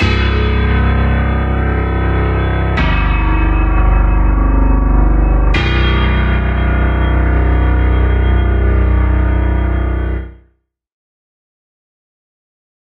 Krucifix Productions SCARY PIANO 2018
music scoring for films